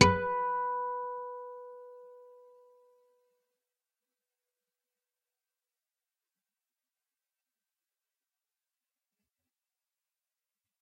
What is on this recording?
Single note 12th fret B (2nd) string natural harmonic. If there are any errors or faults that you can find, please tell me so I can fix it.